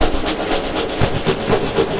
convoluted bare bone loop 60 bpm 022
This is loop 11 in a series of 135 loops that belong together. They all have a deep dubspace feel in 1 bar 4/4 at 60 bpm and belong to the "Convoloops pack 02 - bare bone dubspace 60 bpm" sample pack. They all have the same name: "convoluted bare bone loop 60 bpm"
with three numbers as suffix. The first of the three numbers indicates
a group of samples with a similar sound and feel. The most rhythmic
ones are these with 1 till 4 as last number in the suffix and these
with 5 till 8 are more effects. Finally number 9 as the last number in
the suffix is the start of the delay and/or reverb
tail of the previous loop. The second number separates variations in
pitch of the initial loop before any processing is applied. Of these
variations number 5 is more granular & experimental. All loops were
created using the microtonik VSTi.
I took the bare bones preset and convoluted it with some variations of
itself. After this process I added some more convolution with another